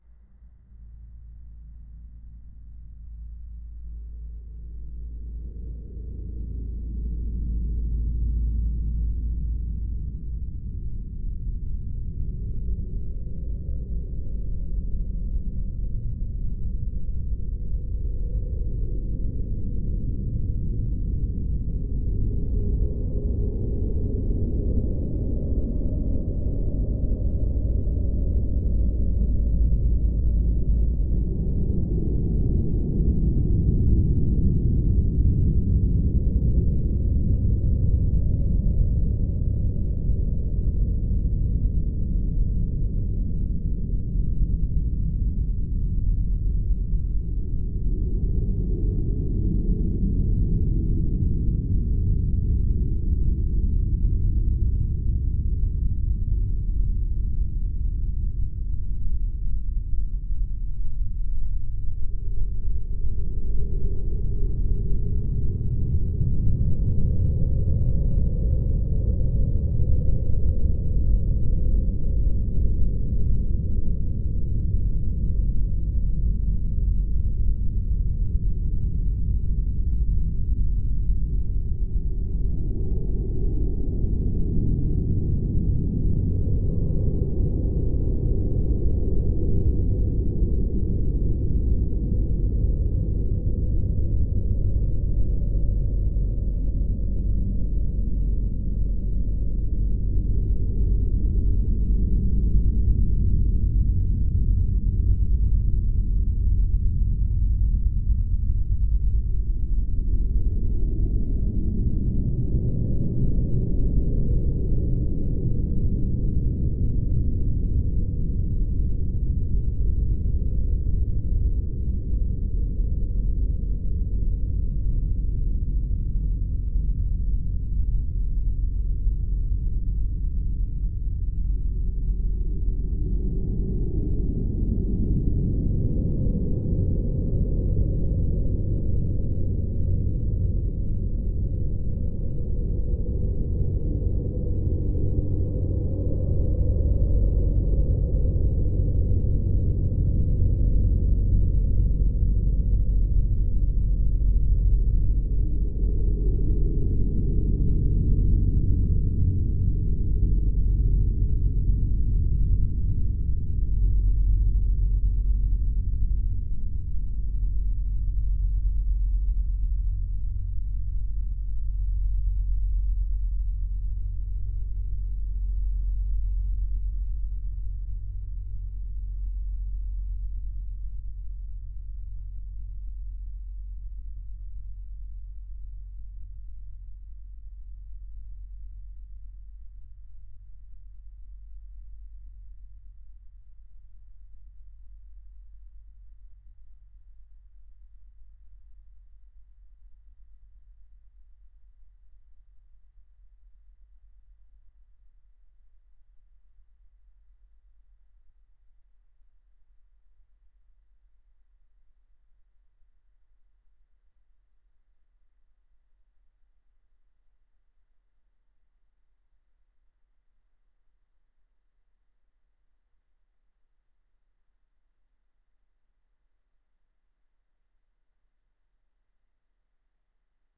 Very low rumble
Very long, very deep ominous rumble with slight rises and falls throughout. Very long tail. This sound was generated by heavily processing various Pandora PX-5 effects when played through an Epiphone Les Paul Custom and recorded directly into an Audigy 2ZS.
ambient, long, low-rumble